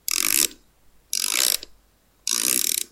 Wind up musicbox
This is a remix of the following ssample:
It had major problems since it was quite noisy, quiet, as well as not properly centered. This sound has less noise, is louder, and properly centered.
remix, wind